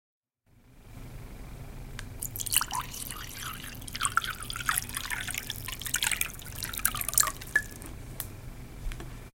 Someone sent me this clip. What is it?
Liquid in Glass 2
Liquid in Glass
bar, Glass, Liquid, Water